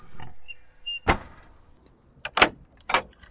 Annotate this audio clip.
Close Gate and You've Got Some Fate
A simple wooden gate that unlocks & opens. Two sounds used first sound is Opening Kissing Door made by the genius planet earthsounds and the second sound being Gate Latch from the one and only mhtaylor67! Thanks for the sounds you two!!